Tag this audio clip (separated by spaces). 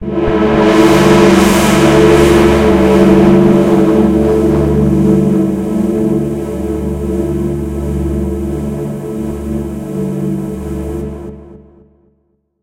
choir pad synth warm